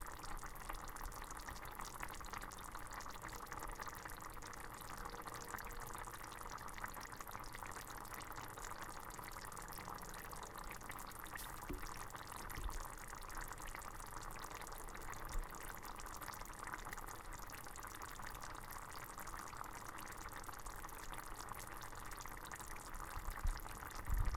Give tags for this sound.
h1
liquid
raw

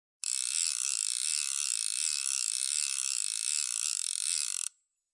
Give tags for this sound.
clicking
fly
reel
retrieve
turning
winding

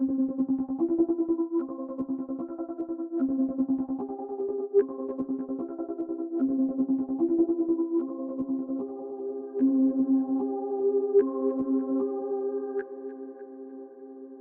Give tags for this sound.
150bpm
chill
fill
electronic
alternative
dance
loop
synth
electro
free

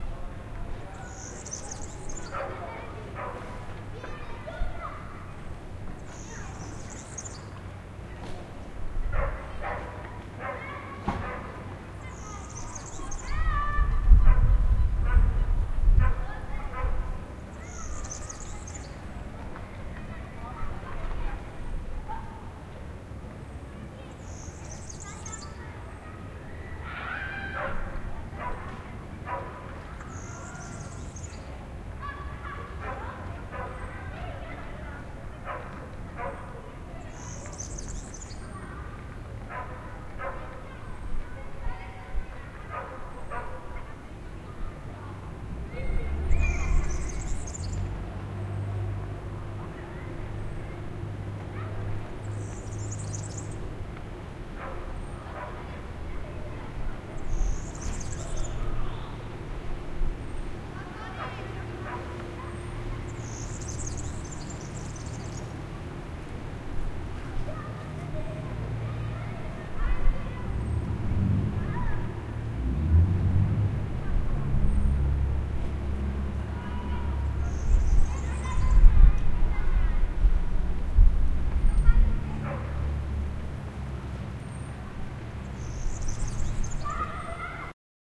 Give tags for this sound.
Surround
water
birds
dog
barking
playing-children

